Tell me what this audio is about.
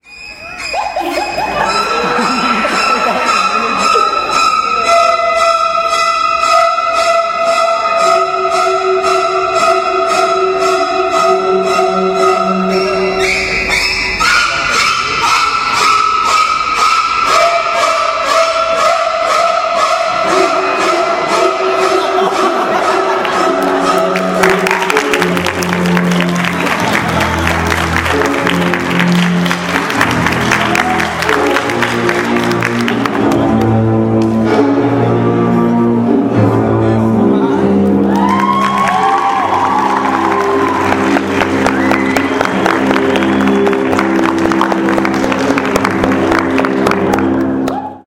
Sessão de filme de suspense filmado em escola pública
Data: 10/jun/2016
Horário: 14:57
Gravado com gravador de mão Sony PX820
Som captado por: Reifra Araújo e Larissa Azevedo
Este som faz parte do Mapa Sonoro de Cachoeira
Thriller session filmed in public school at Cachoeira City
Date: Jun/10/2016
Time: 2:57 P.M.
Recorded with handy recorder Sony PX820
Sound recorded by: Reifra Araújo and Larissa Azevedo
This sound is part of the Sound Map de Cachoeira
cine-club, cine-clube, cinema, film, horror, movie, o, people, sess, session, suspense, thriller, universidade, university